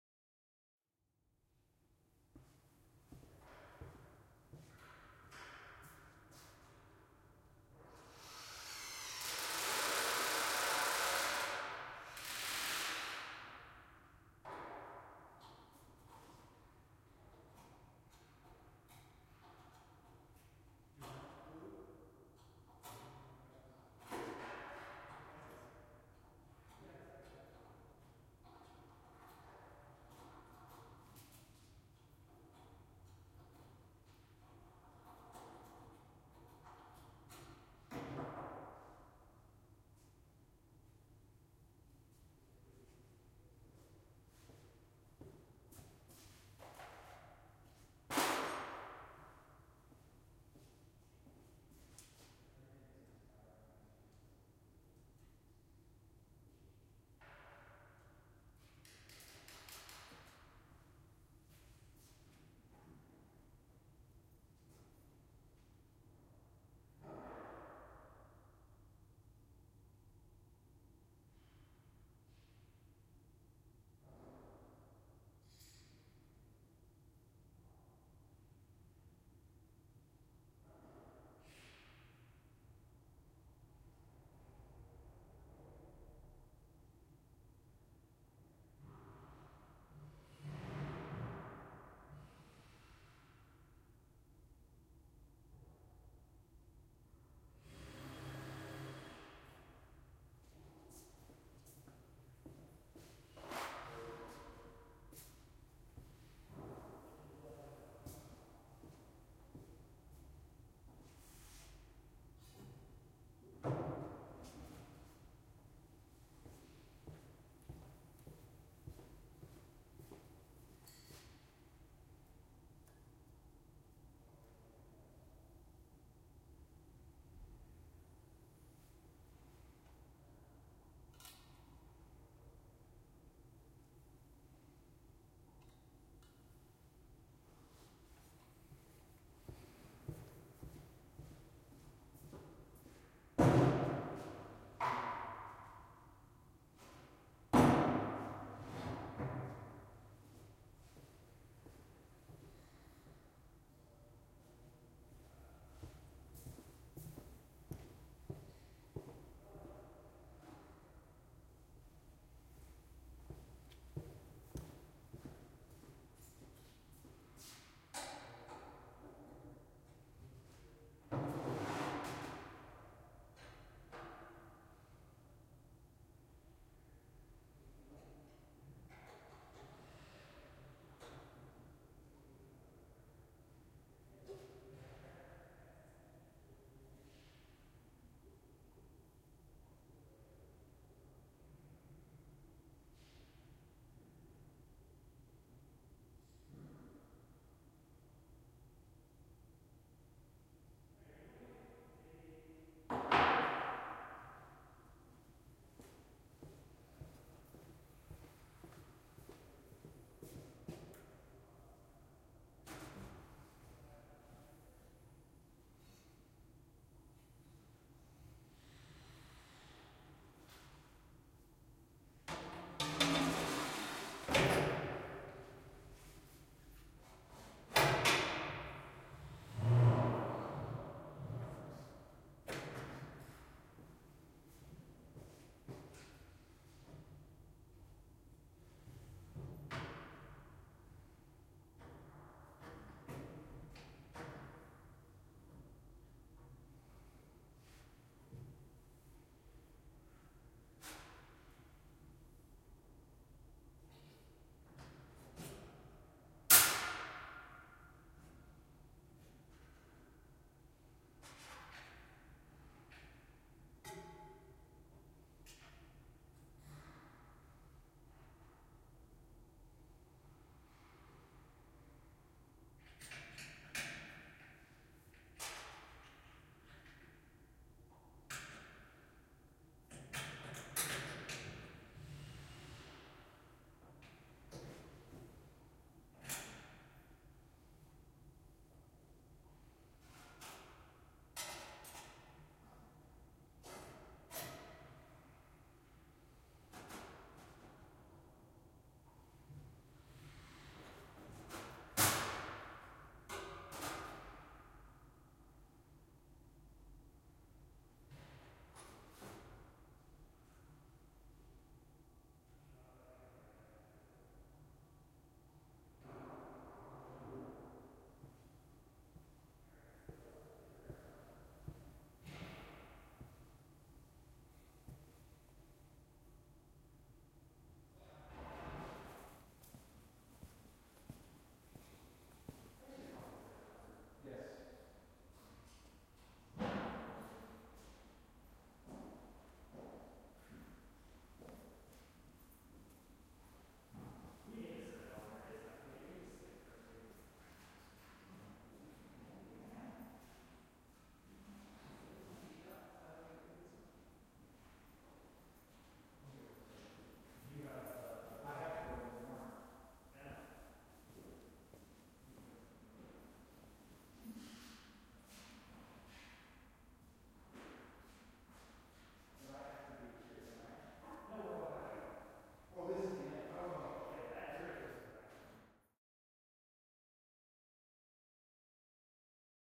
Room Tone - small warehouse with minor construction going on